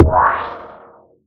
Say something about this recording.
layered, filtered, timestretched, percussion.
experimental, fx, percussion, space, weird